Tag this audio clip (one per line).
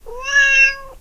cat
cats
meow
miaou
miau